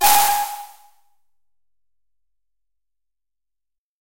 Tonic FX Shaker
This is an electronic shaker like effect sample. It was created using the electronic VST instrument Micro Tonic from Sonic Charge. Ideal for constructing electronic drumloops...